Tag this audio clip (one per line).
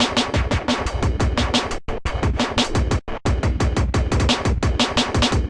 ambient
nes
snes